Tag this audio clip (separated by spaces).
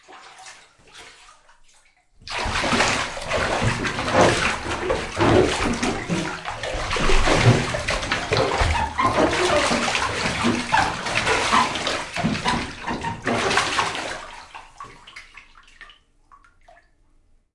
bathtub house Squeak Squeaking water-splashing